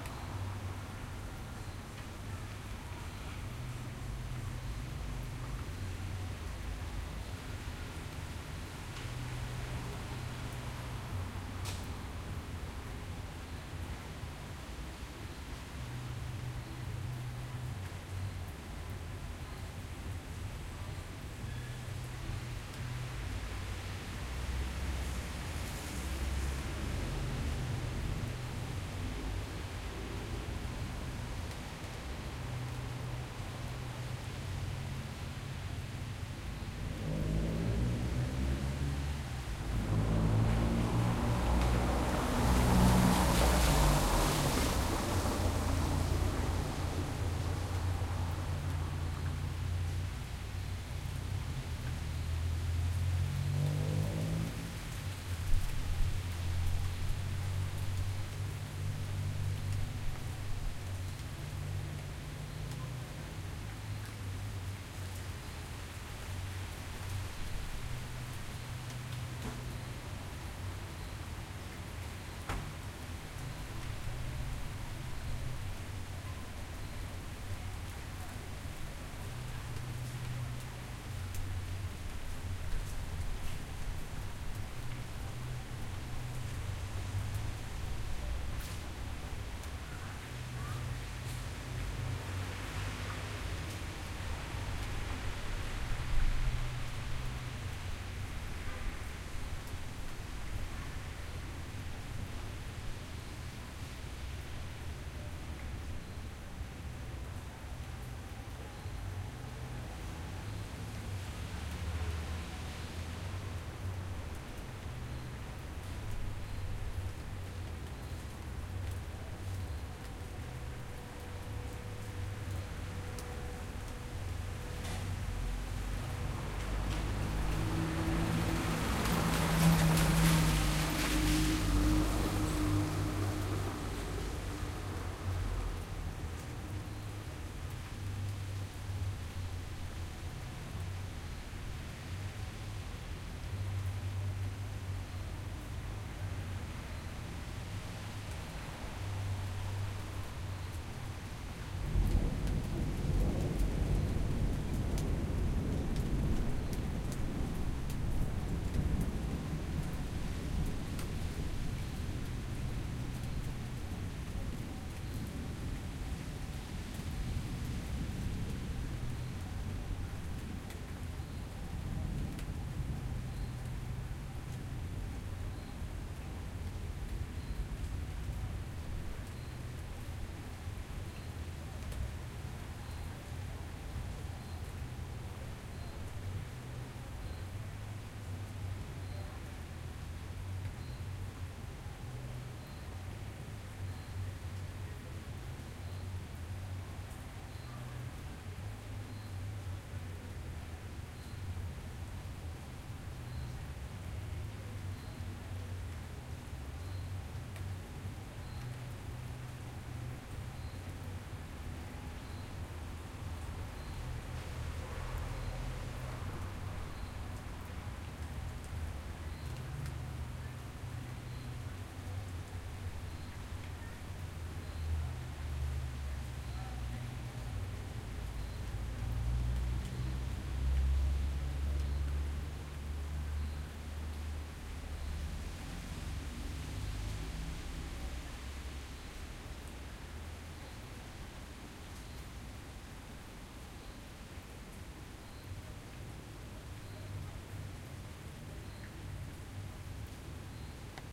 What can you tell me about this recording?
Ambience recorded in stereo with a H4N from a balcony in a rainy night.